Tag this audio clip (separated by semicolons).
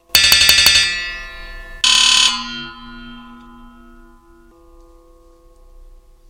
bits; fragments; lumps; melody; movie; music; toolbox